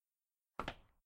A one-shot footstep on a concrete surface.